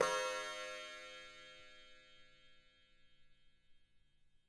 Clock Chime
This is a sample of one of my old clocks that I used for a song.